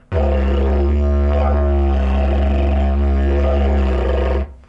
Sounds from a Didgeridoo